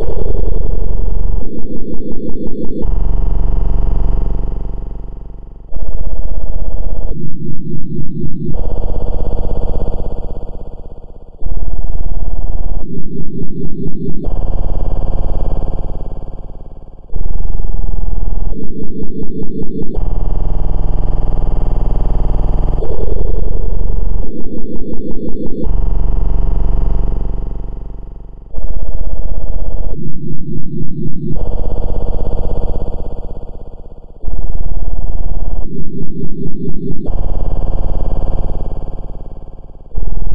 Fx Glitch 5
5, glitch